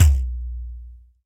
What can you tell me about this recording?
Pd3 Bass
Thumb Bass, post-processed with Electri-Q 'digital'
bass, pandeiro